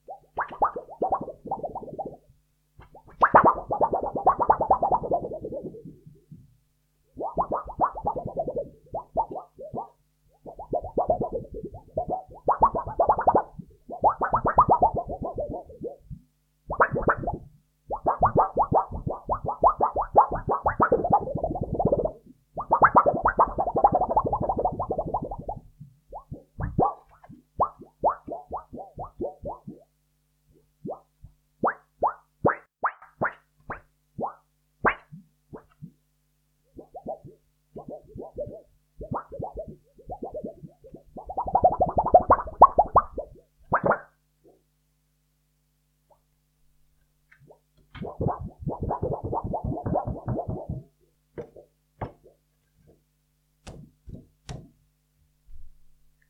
This is sort of a cartoon sound recorded with a PG 58 from SHURE.
It was made by wiggling and deforming a carbon fiber rectangle.